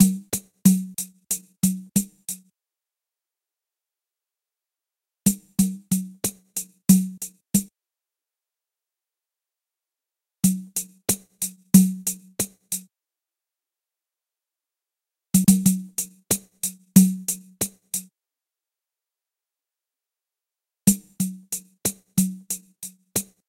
Loop Pandeiro 92
Loops of Pandeiro Samba Brazilian song... Time 92
brazil, samba, pandeiro, musica